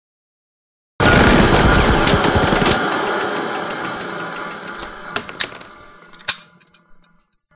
Chain Gun Wind Down
chain, chains, click, down, dryfire, empty, gun, wind